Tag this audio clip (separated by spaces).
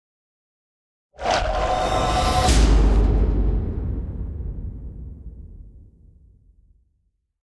creepy science dissonant suspense dramatic eerie sfx breath impact layered sting dark horror paranormal